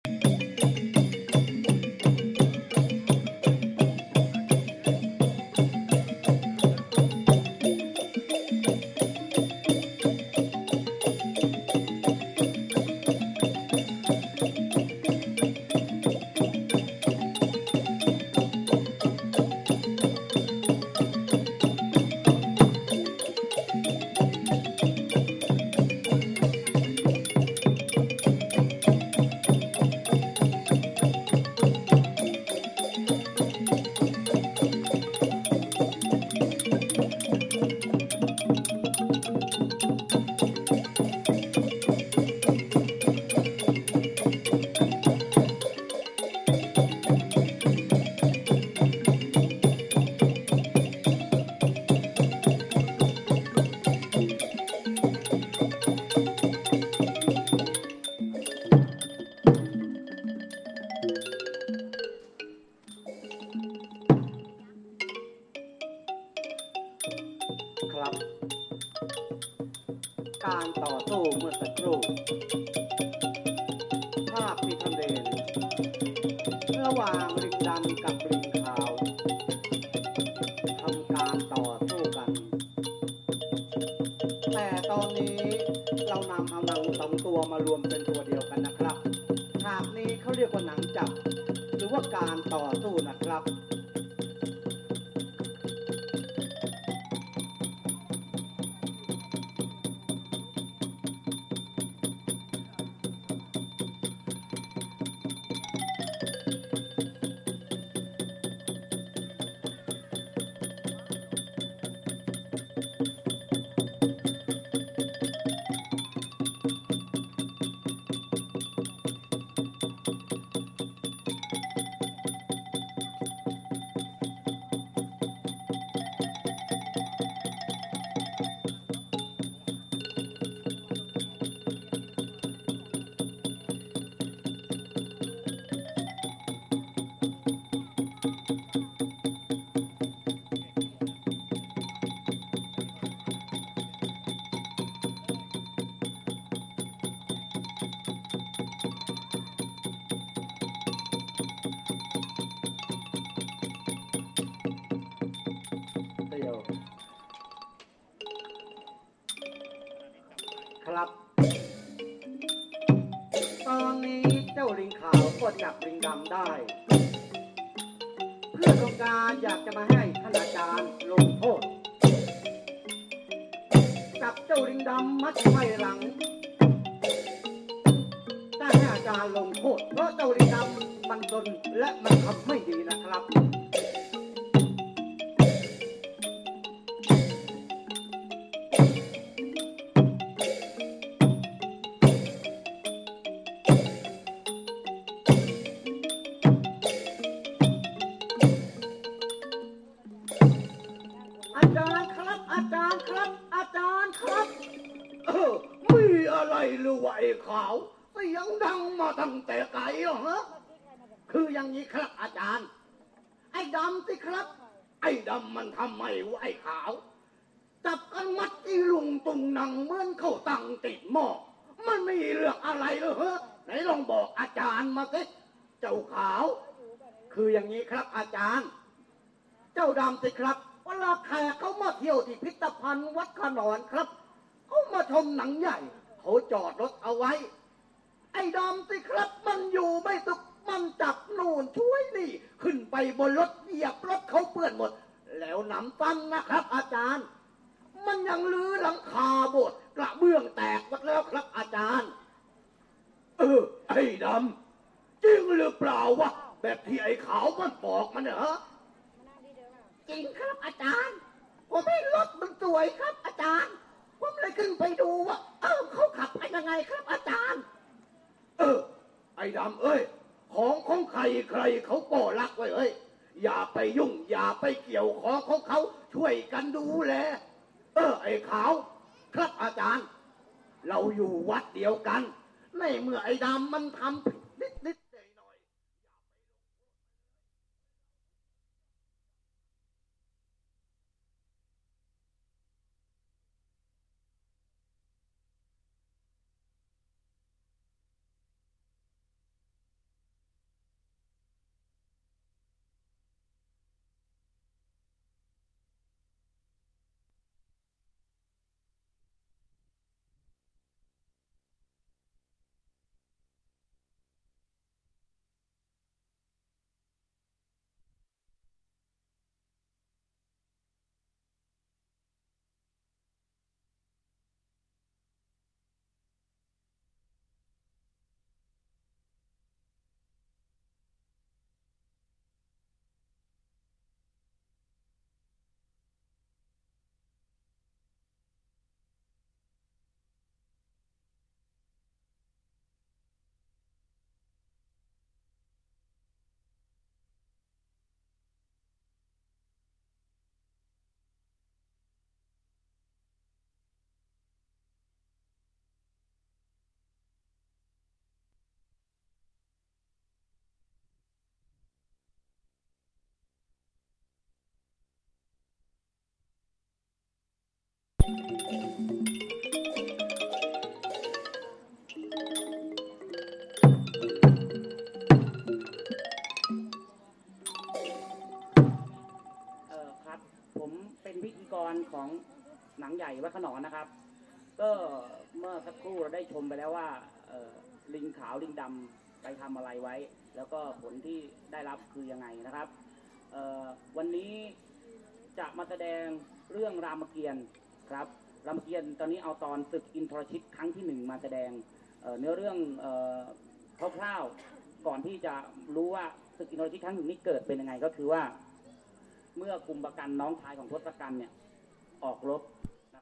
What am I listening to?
chineeplay-mono
one of several Chinese-looking plays that were happening around Bangkok's china town.
They were recorded using a Sony stereo lapel mic on a hacked iRiver H400 running Rockbox.